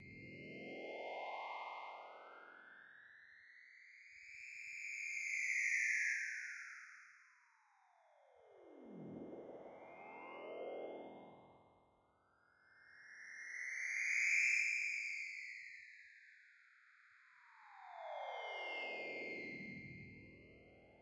Forbidden Planet 3
A collection of Science Fiction sounds that reflect some of the common areas and periods of the genre. I hope you like these as much as I enjoyed experimenting with them.
Alien, Electronic, Futuristic, Machines, Mechanical, Noise, Sci-fi, Space, Spacecraft